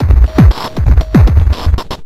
flstudio random actions
experimental, glitch